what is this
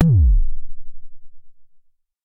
MBASE Kick 11
i recorded this with my edirol FA101.
not normalized
not compressed
just natural jomox sounds.
enjoy !